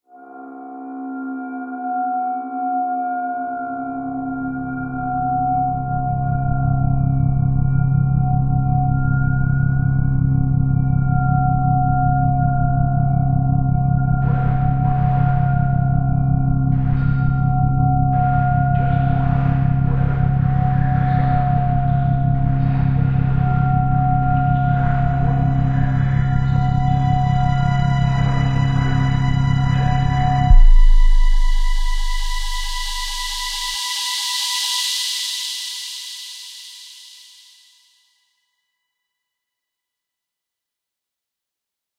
Creepy background track
A background made with french horn, some violin and steam.
Did you like this sound?